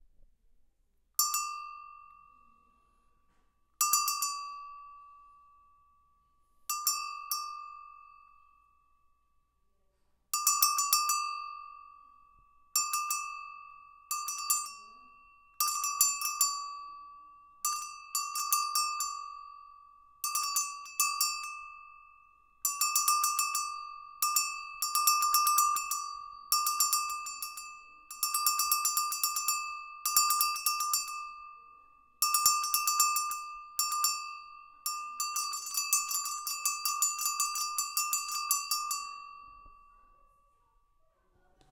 Cow Goat Bell Vaca Carneiro Sino Polaco Bells
Sino, Cow, Carneiro, Bell, Goat, Vaca, Bells, Polaco